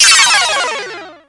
sfx-downward-4
Made with a KORG minilogue
sfx, sound, synthesizer, fx, game, effect